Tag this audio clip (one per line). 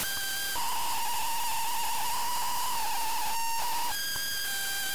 creepy
god
horror
oh
why